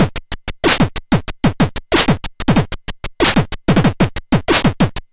Atari ST Beat 03
Beats recorded from the Atari ST